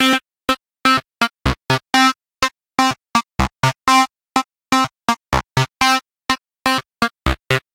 Groove 5-Audio
Made in Ableton with various synths and effects. groove synth fat layer beat phat 124bpm
5, clean, club, dance, groove, hard, loop, loud, pearcing